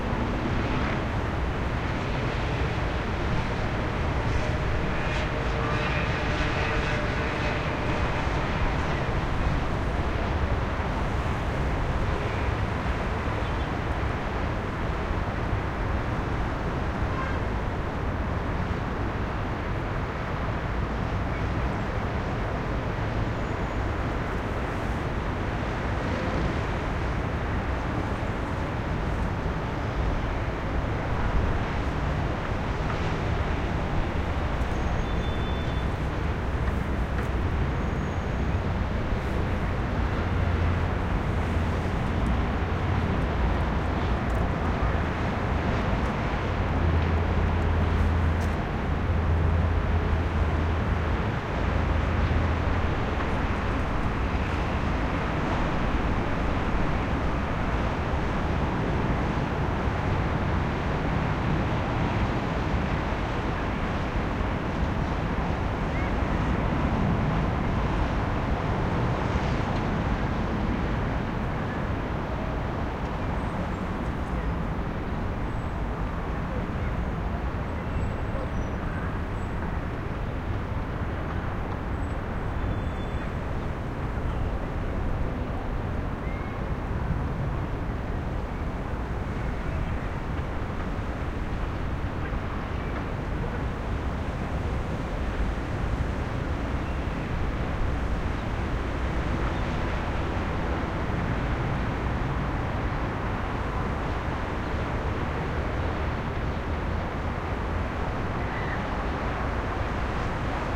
Heavy Traffic From 23th Floor Paris 1PM
City, Paris, Traffic